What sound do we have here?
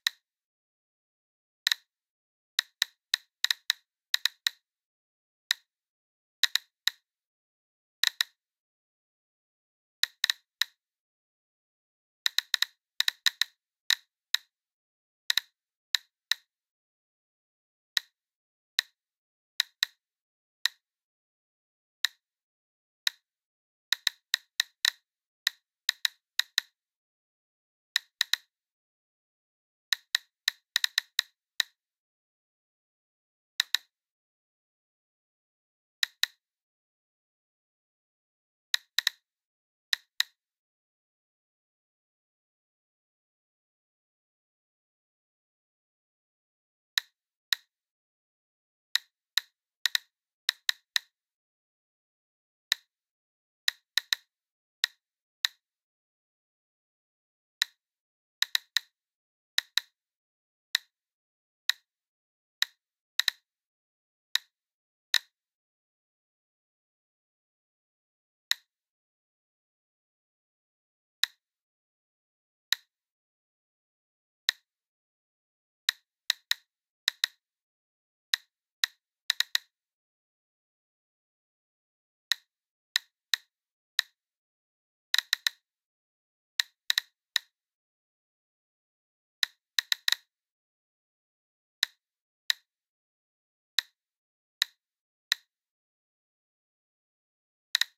This is an edit of a recording of a real Geiger-Müller-counter, detecting normal background radiation. The reading of the Geiger-Müller-Counter was averaging at around 0,13 µS/hour (read: "micro-sieverts per hour"). The recording was then cut into four equal-length parts and overlayed with itself, to create the sound the Geiger-Müller-counter would produce when reading 0,5 µS/h. This reading still would not be dangerous.
The recording was taken with two small-diaphragm condenser microphones in XY-configuration. The recorded signal was processed with a noise gate, to eliminate background hiss. No further processing was applied.